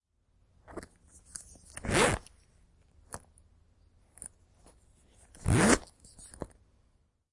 A zipper opening a bag or purse, and then closing.